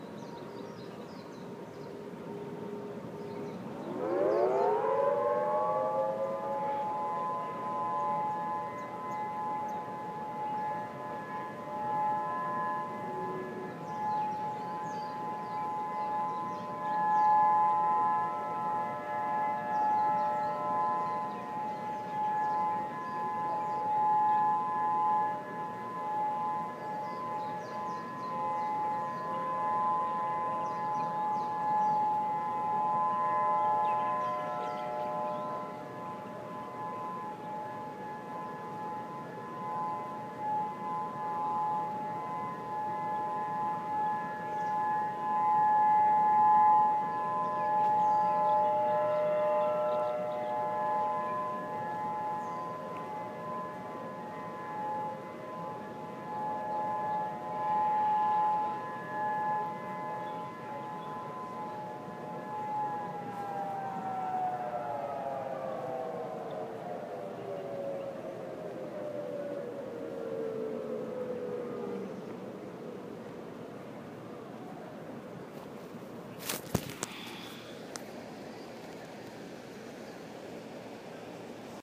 Siren ambience Manoa valley
Honolulu, Hawaii. Siren ambience in Manoa valley. Thursday October 1, 2015. You can hear a DSA and 2 Thunderbolt 1000T's.
1000AT, 1000BT, Civil, Defense, DSA, Siren, Thunderbolt